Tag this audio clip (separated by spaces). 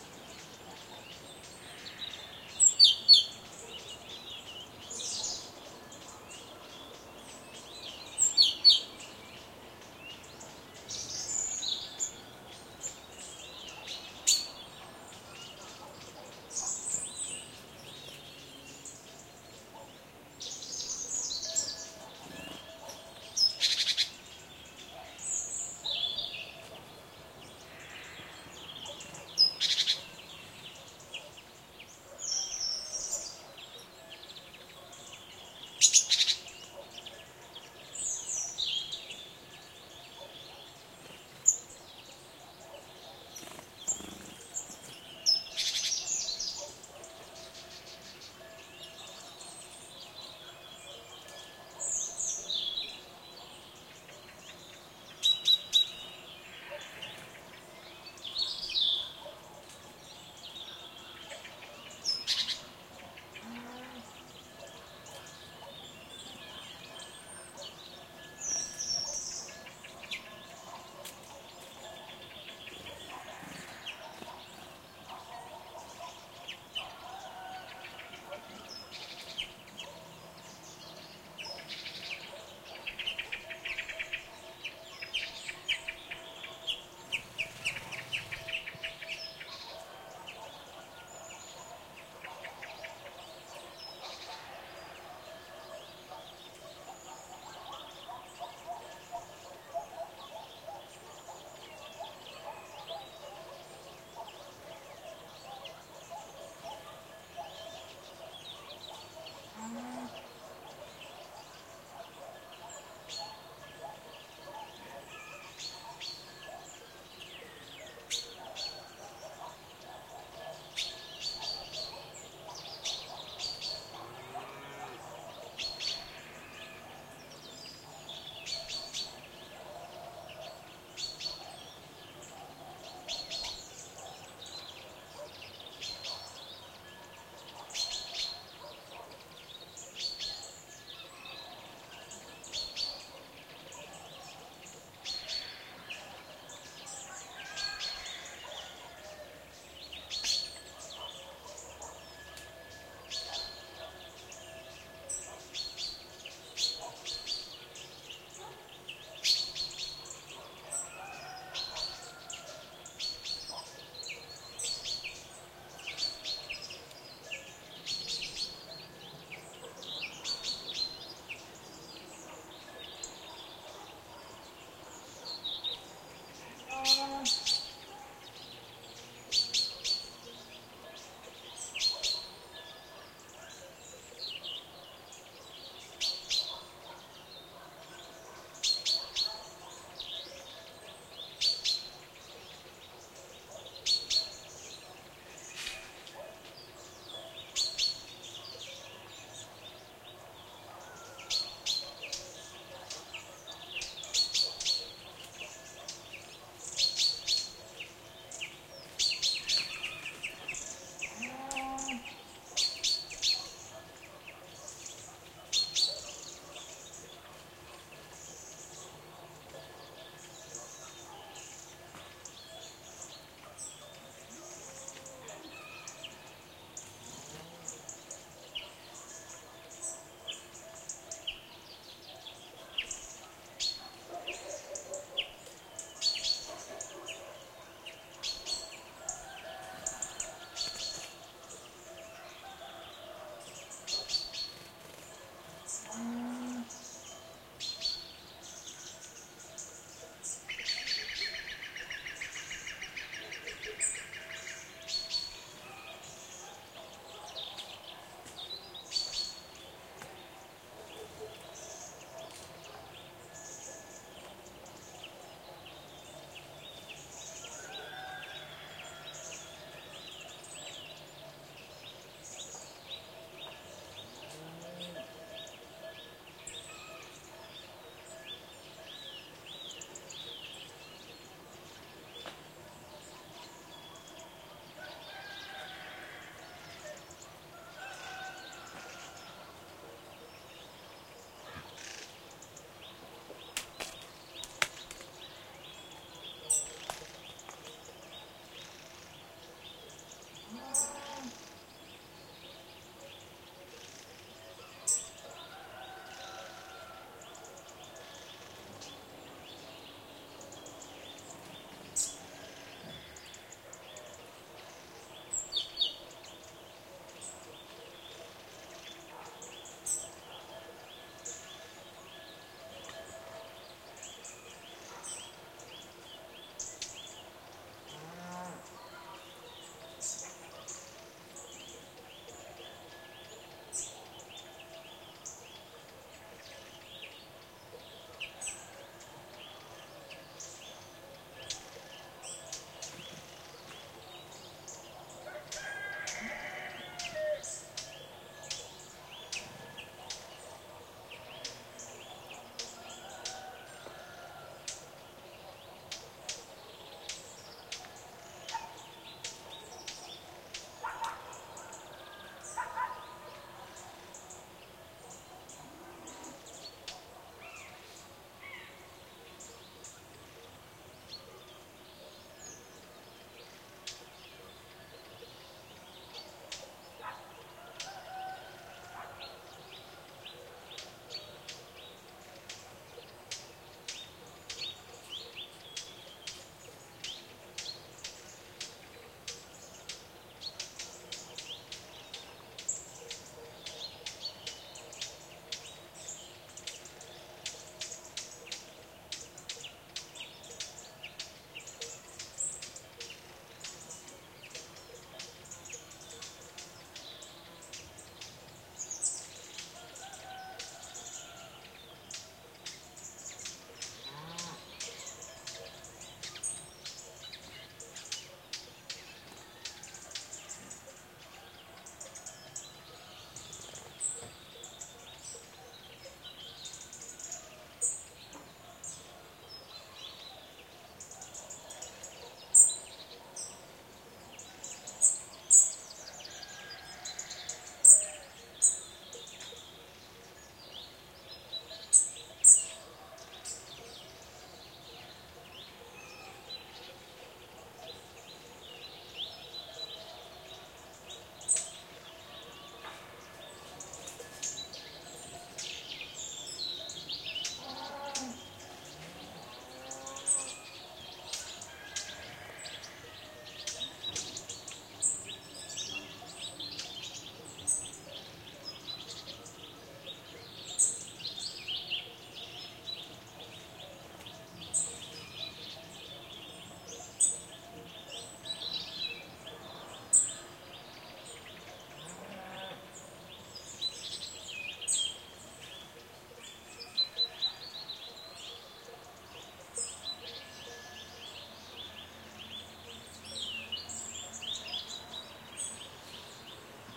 ambiance autumn field-recording barkings countryside birds rural village nature